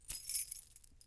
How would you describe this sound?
In an old apartment, Montreal, corner of Esplanade and Mont-Royal, summer 2013.